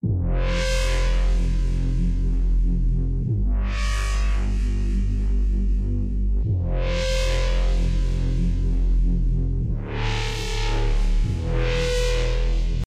Hypo-DistBass-150bpm
Distorted biting bassline loop.
At your own risk :)